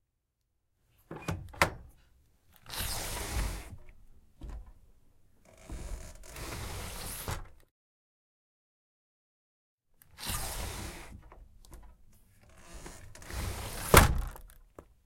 sliding window door open closed